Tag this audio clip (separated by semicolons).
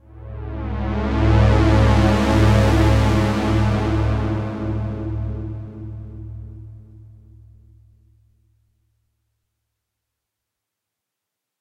80s; effect; pulse; sci-fi; synth